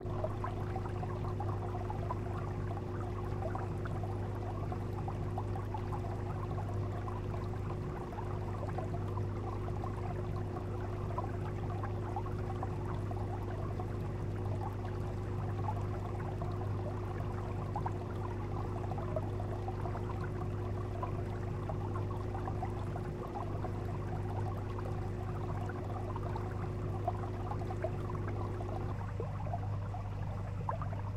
Indoor Fish Tank without Bubble strips Ambiance
Beautiful aquarium sound like the other I uploaded only without bubbles. The other sounds were as follows and edited in Vegas Pro 11.0
162629__hank9999__mini-waterfall-prefx-
Hope you enjoy
aquarium, aquarium-ambiance, bubbles, Fish-tank, gurgle, tank, water-pump